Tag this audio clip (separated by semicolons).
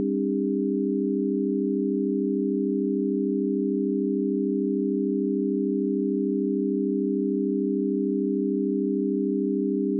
ratio; pythagorean; signal; chord